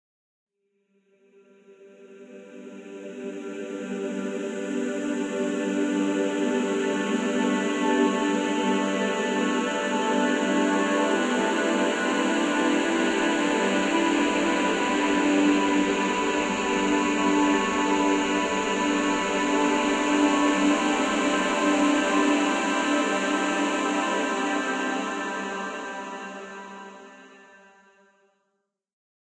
An ethereal sound made by processing a acoustic & synthetic sounds.
atmospheric, blurred, choir, emotion, ethereal, floating, synthetic-atmospheres, vocal, voice